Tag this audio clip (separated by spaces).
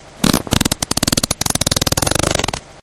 flatulate flatulation fart poot gas